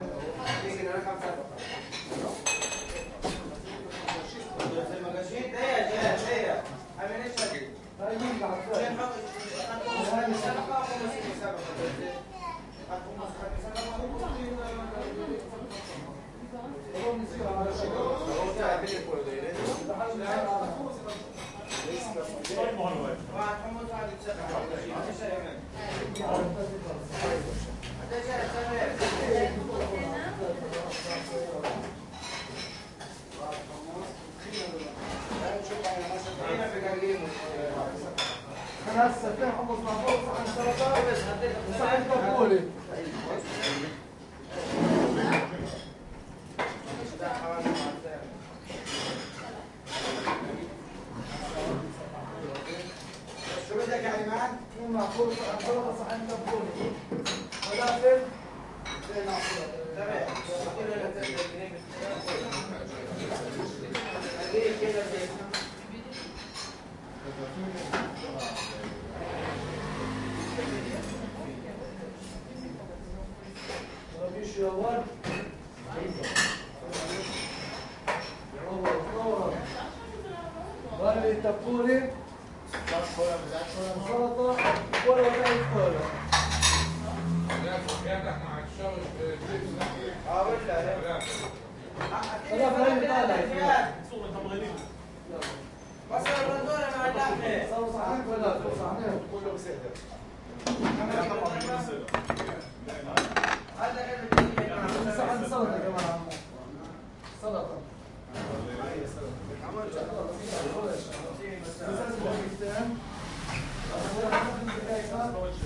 Arabic Small Busy Restaurant Amb, Tel Aviv Israel
Arabic Small Busy Restaurant recorded in Israel